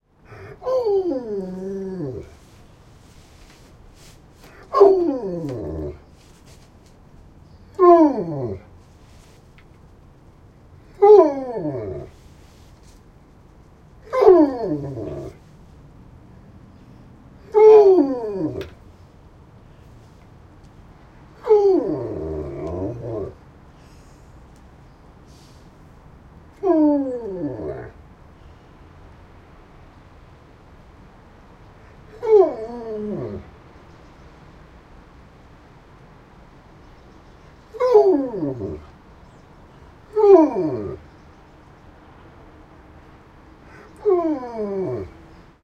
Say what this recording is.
Igor18B-repeated statement
A recording of my Alaskan Malamute, Igor, while he is waiting for his dinner. Malamutes are known for their evocative vocal ability. Recorded with a Zoom H2 in my kitchen.
bark, dog, growl, howl, husky, malamute, moan, sled-dog, wolf